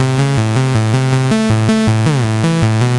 Even more loops made with software synth and drum machine and mastered in cool edit. Tempo and instrument indicated in file name and or tags. Some are perfectly edited and some are not.